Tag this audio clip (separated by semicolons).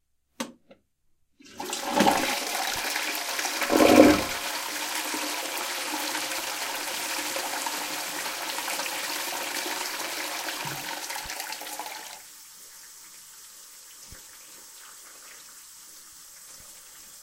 wash; water